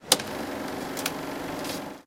Manipulating knobs off a 16mm projector - Brand: Eiki
Manipulación de perillas de proyector de 16mm - Marca: Eiki